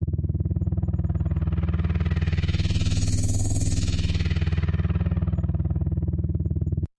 | - Description - |
Sound similar to helicopter or drone propellers
| - Made with - |
Serum - Fl Studio.
For science fiction projects, video games or whatever I wanted.